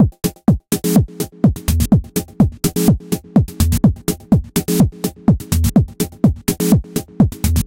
Drums loop Massive 120BPM-05
120bpm, drums, loop